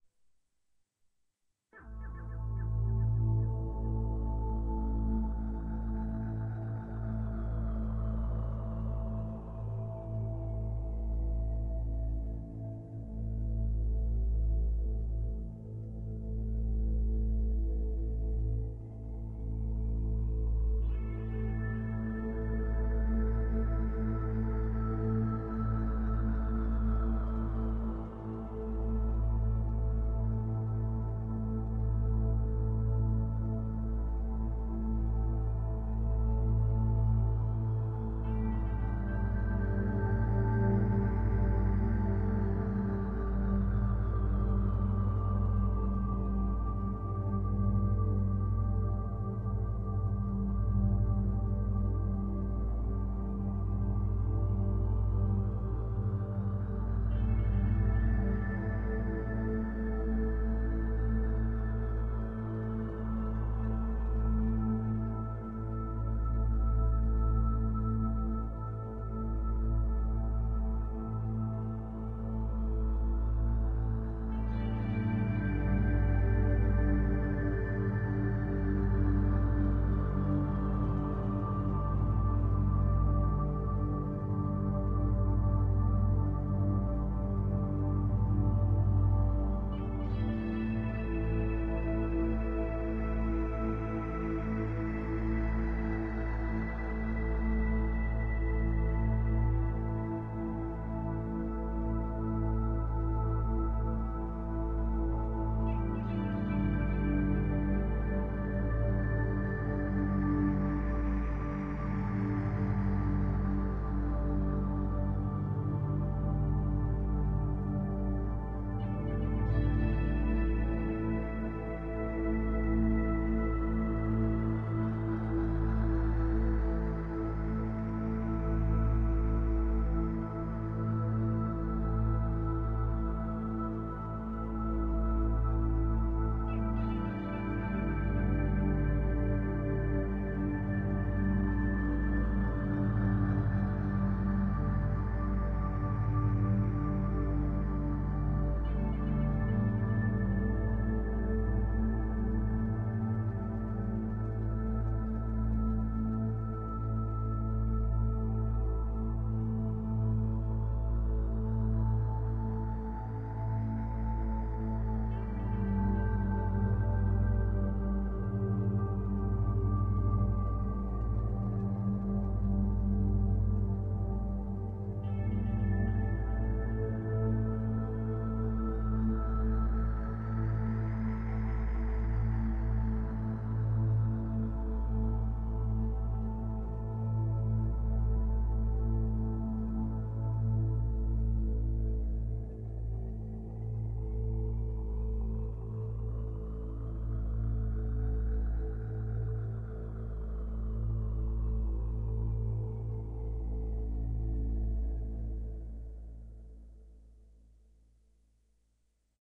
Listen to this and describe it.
relaxation music #18
Relaxation Music for multiple purposes created by using a synthesizer and recorded with Magix studio.
harp, relaxing, relaxation, meditative, simple, meditation